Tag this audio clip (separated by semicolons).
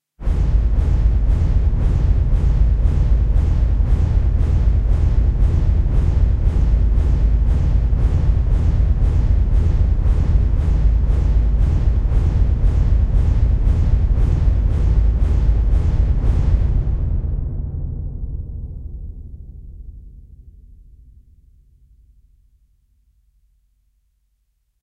Submarine; Deep